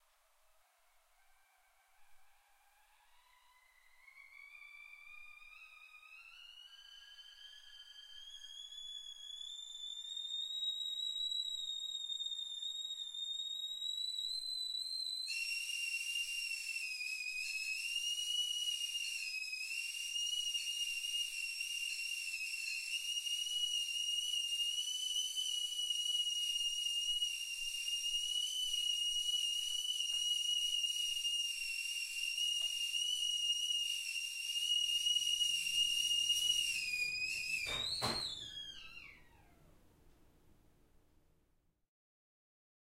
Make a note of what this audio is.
long sound of a tea kettle whistling, before the burner is turned off and the kettle stops its whine.
real, tea, whistle